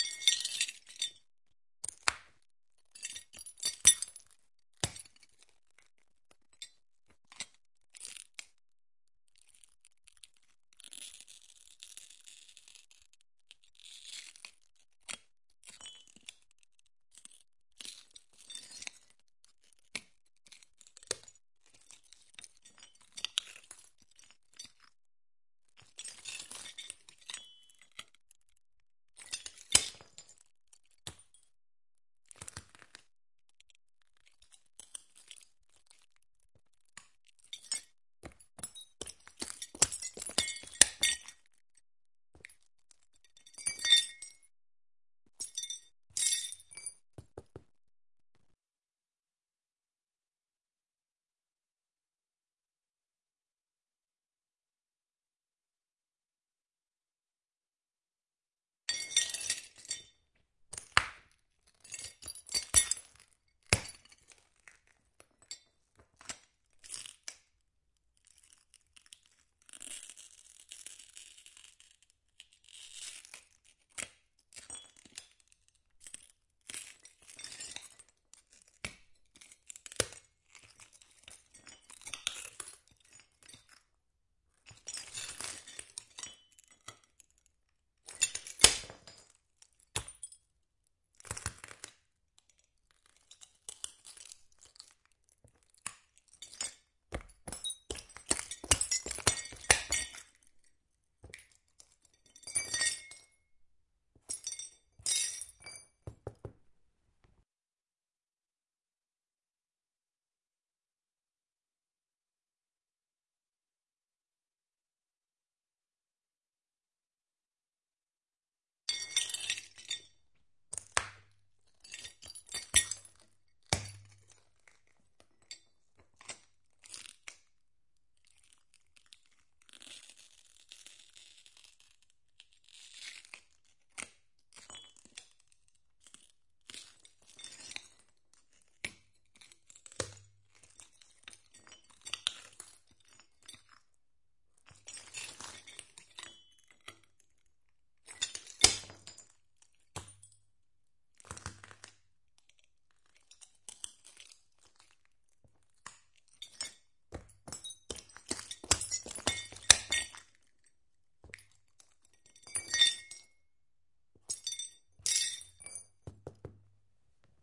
Crunching glass shards 1
Crushing and crunching glass by foot, well... Shoe.
Tiny glass particles colliding when stepped on.
Recorded with:
Zoom H4n op 120° XY Stereo setup
Octava MK-012 ORTF Stereo setup
The recordings are in this order.
breaking, dropping, falling, floor, glass, glasses, ortf, xy